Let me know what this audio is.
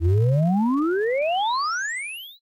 This is a sound effect I created using ChipTone.